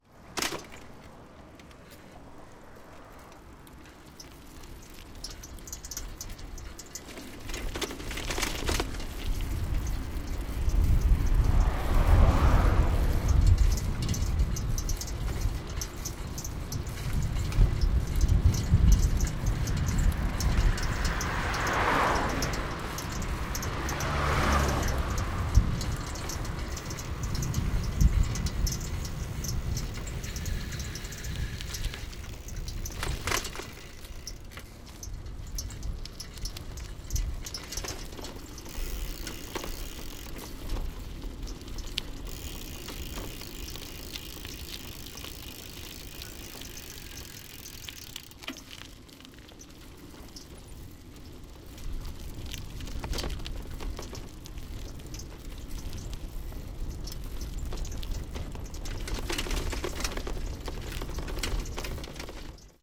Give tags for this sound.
city; bicycle; bike; cycling; shaking; fahrrad; metal; vehicle; berlin; shaky; night

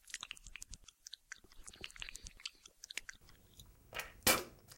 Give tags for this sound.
paste; cream; toothpaste

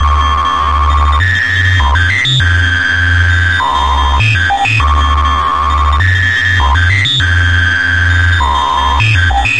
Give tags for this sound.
indus; noise; loop; techno

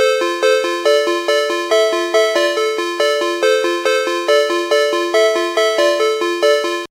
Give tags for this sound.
thoughtful; ring; cell; 09; ring-tone; mojomills; mojo-mills; cell-phone; ring-alert; alert; tone; free; jordan; phone; 3; mono; mills

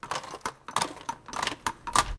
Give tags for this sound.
crank; cranking; old; winding

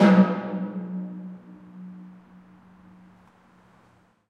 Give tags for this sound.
garage; snare; reverb

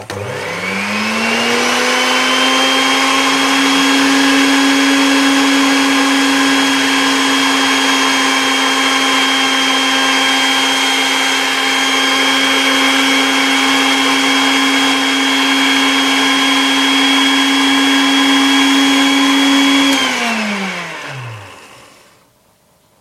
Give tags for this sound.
food; mixer; fast; home; appliance; mix

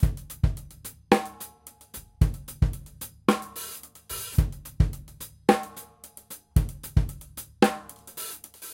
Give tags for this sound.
h4n; drumloop; drums; acoustic; loop

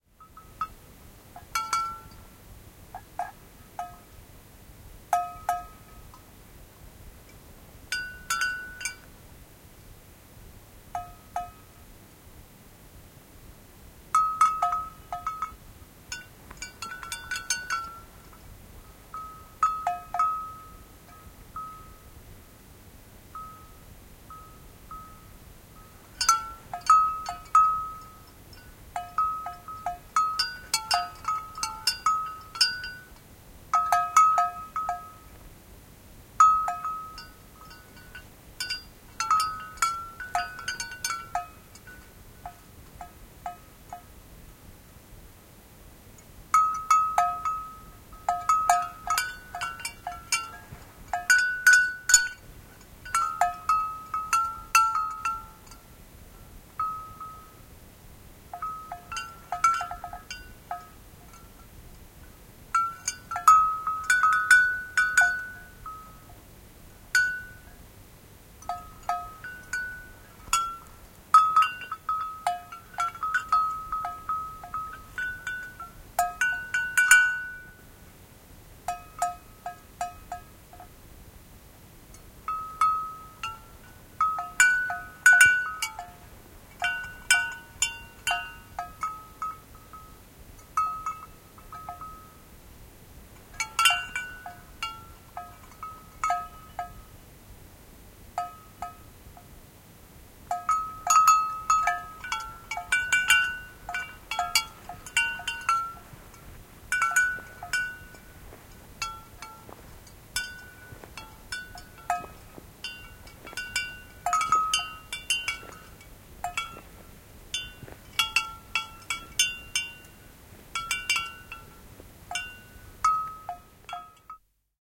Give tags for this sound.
Animals; Field-Recording; Finland; Finnish-Broadcasting-Company; Lapland; Lappi; Poro; Porotalous; Reindeer; Reindeer-farming; Soundfx; Suomi; Tehosteet; Yle; Yleisradio

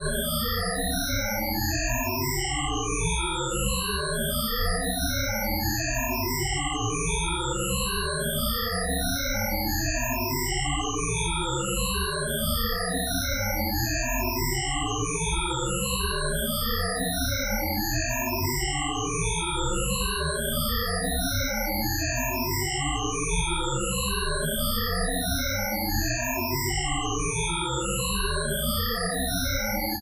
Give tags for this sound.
dance; loop; sound; space

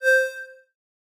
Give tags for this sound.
hum; pad; user-interface; game; videogam; click; humming

